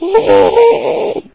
Slow Zombie Death
A slow zombie dies